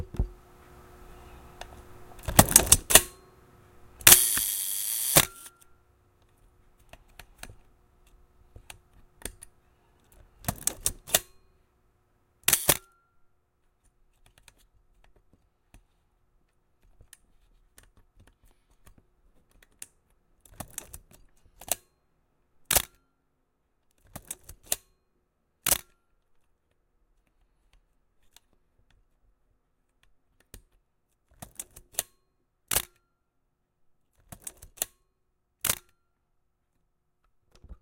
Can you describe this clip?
pentax k1000 loading film and shutter click at various speeds
pentax k1000 load film and shutter clicks. the first one with 1 second of exposure. the second one with 1/8s. the third and fourth - 1/125s. the last 2 clicks with 1/1000s.
camera, k-1000, loading-film, pentax, photo, photography, release, shutter, slr